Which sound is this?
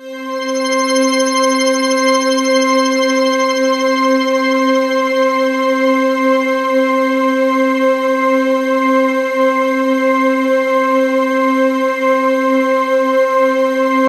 Custom pad I created using TAL Sampler.
pad, single-note, synth